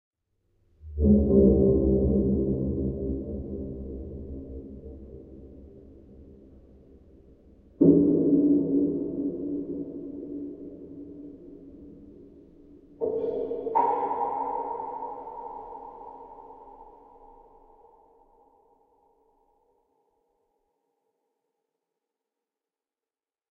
220302 the lift 2
Sounds coming from the lift shaft. Recorded with a Tascam DR-05X with noise reduction and reverb.
low-frequency, ambience, dark, drone, suspense, sinister, atmos, friction, reverb, shaft, deep, soundscape, bass, general-noise, weird, background-sound, ambient, horn, creepy, space, spooky, atmosphere